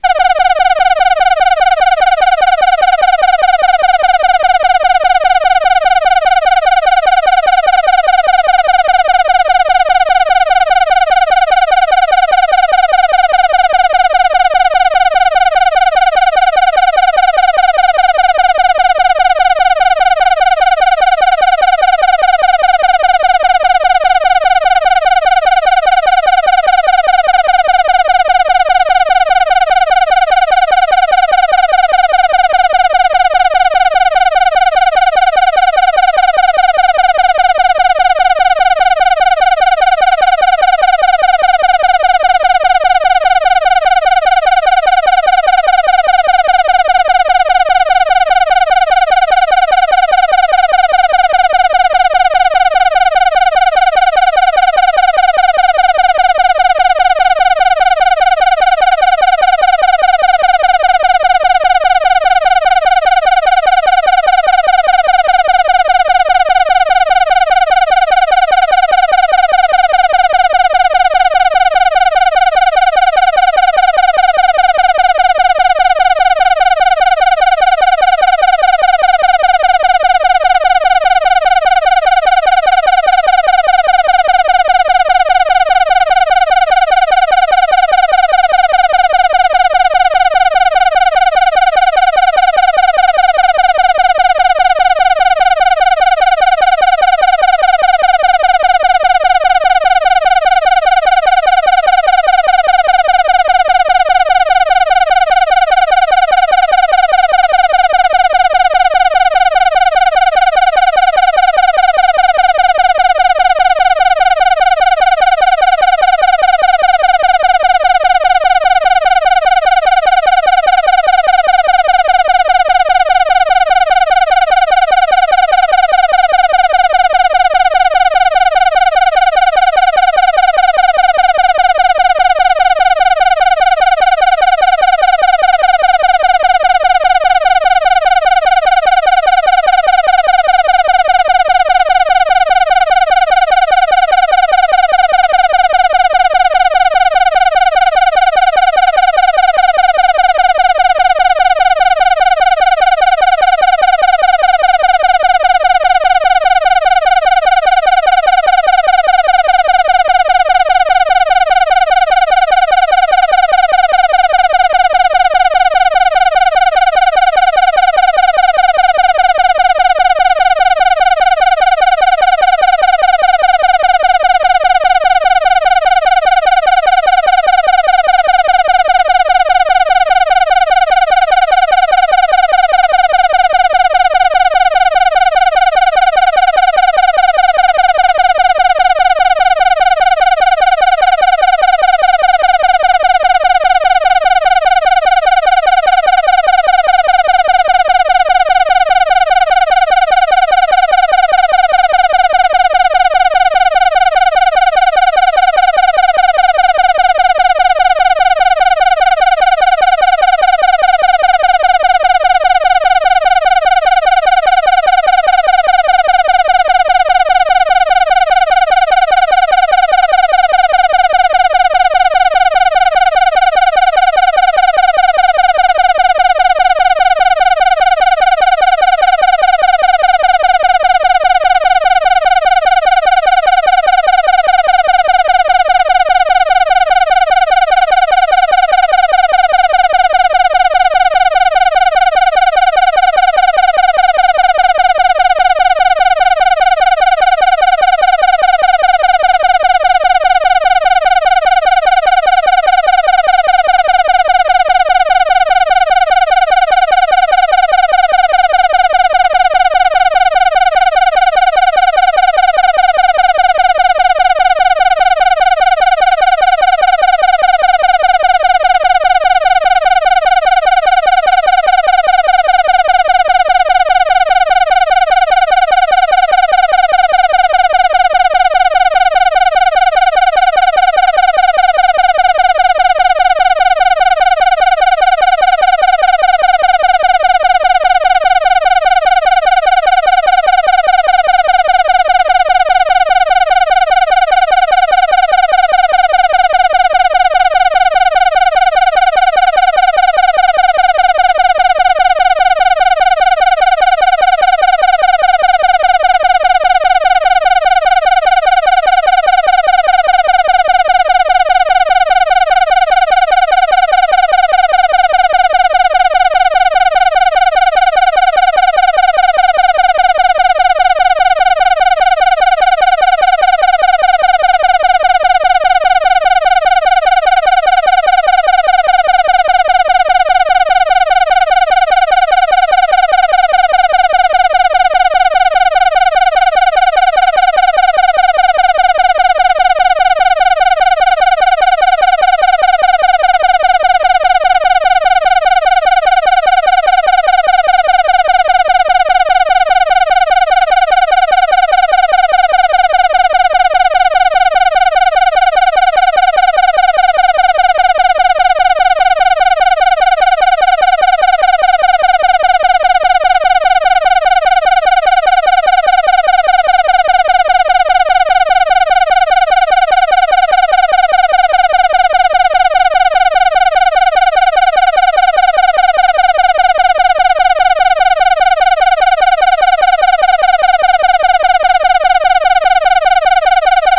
Netherland NordLead 3 3
3, nordlead